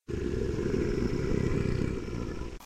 A monster growl made by accident. Oops!